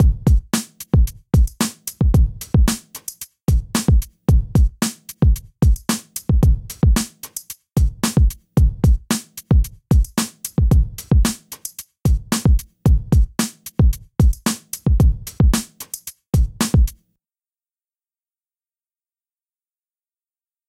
main psybient 2
i think i did this in reason on the redrum. did some psybient stuff the other night.
break, breakbeat, chill, chillout, drums, hip-hop, loop, psybient, psychill, rhythm